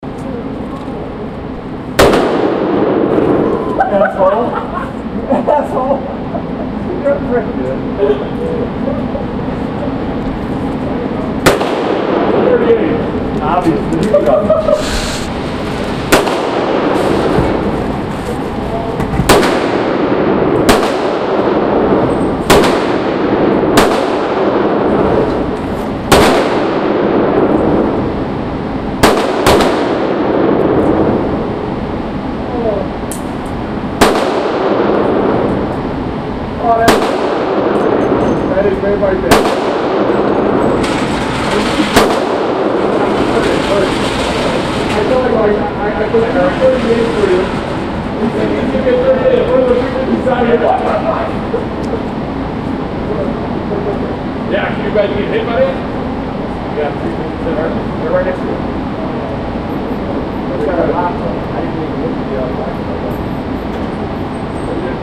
GunRange Mega2
Guys talking and laughing in gun booth, shooting 9mm.